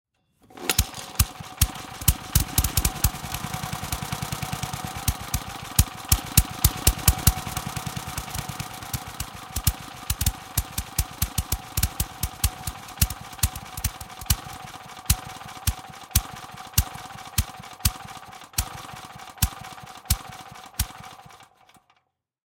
this is a recording of an old stationary gas engine(1941 Briggs and Stratton)being started. It idles unstably and eventually dies out.
Recorded with Schoeps MS mic setup to a Sound Devices MixPre-6
chug, chugging, engine, gas-engine, go-cart, hit-and-miss, idle, lawnmower, low-gas, machine, motor, motor-bike, smoke, start, vintage-engine